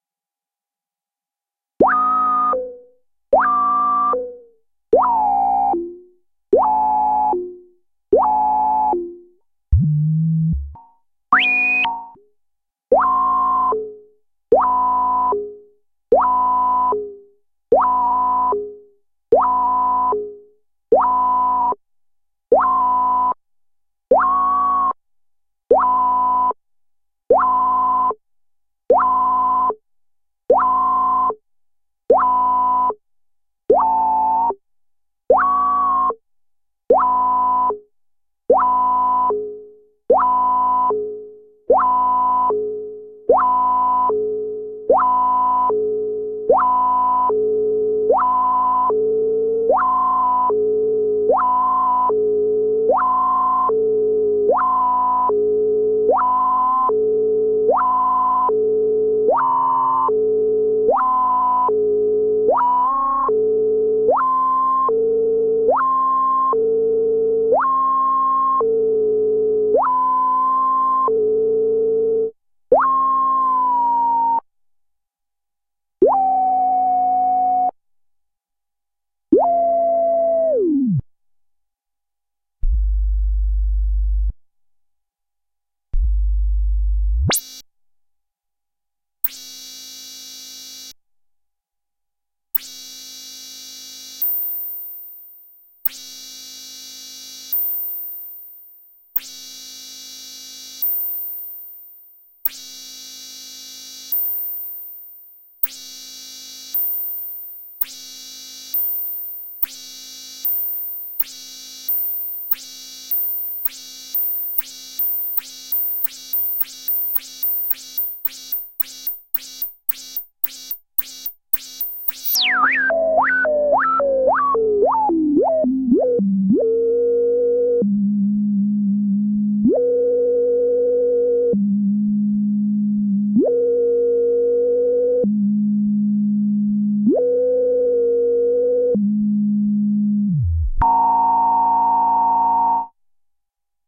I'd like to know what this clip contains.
SciFi Retro Oscillators Lab 01
Old oscillators being put to good use! Intentionally dry of any compression, EQ, or effects.
oscillators, scientist, mad, scifi